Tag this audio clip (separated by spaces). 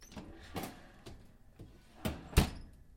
Field Kitchen Metal record